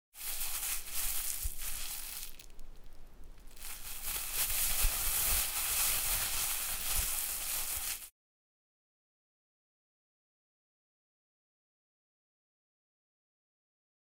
Crinkling of a plastic bag.